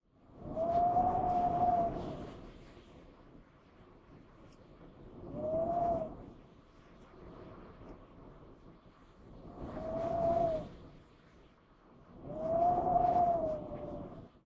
Window Whoosh
A recording from the ongoing storm in Sweden, Halland. (Class 3 warning got issued)
This is a recording from my partly-closed window as the wind is slipping through.
This storm came from England and arrived in Sweden around 8PM 2013/10/28.
Recorded with a Blue Yeti microphone.
brittain, halland, storm, sweden, varberg, wind, window, woosh